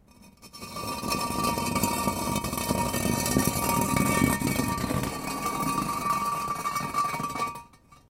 Just dragging a concrete block on the ground.
creepy, grave, soundeffect, stone